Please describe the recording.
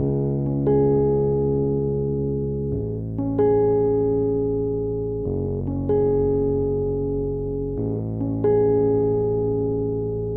There is a fluttering neon sign outside.